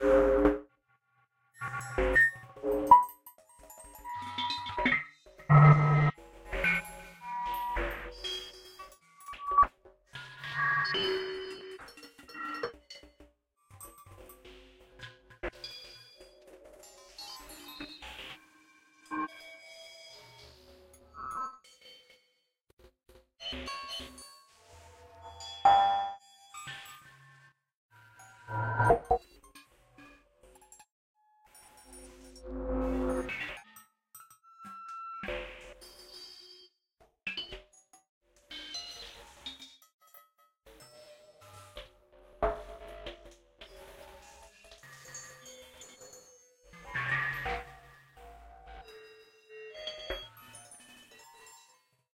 Homage to K. Stockausen. Sequence generated via computer synthesis.